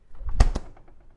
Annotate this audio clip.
refrigerator door close
A refrigerator door closing from the exterior.
Recorded with a Zoom H1 Handy Recorder.
close, door, refrigerator, exterior